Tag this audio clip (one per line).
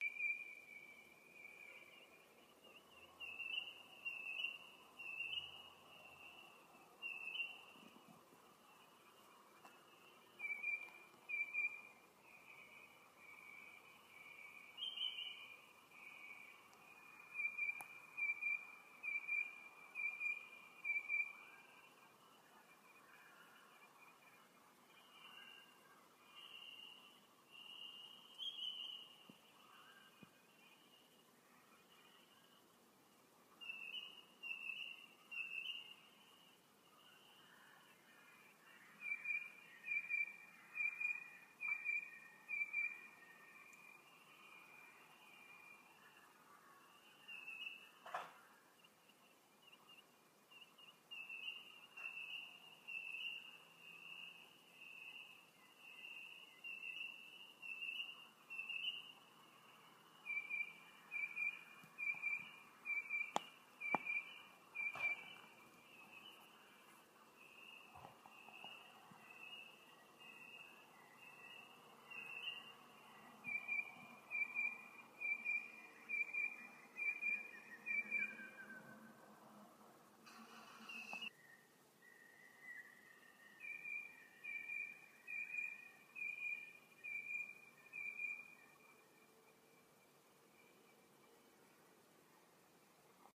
Chirp
Tweet